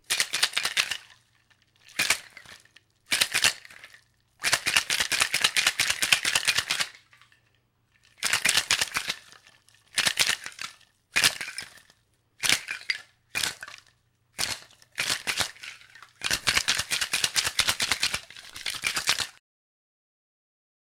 Martini Shaker
Sounds of liquid and ice being shaken in a metal cocktail shaker
liquid, shaking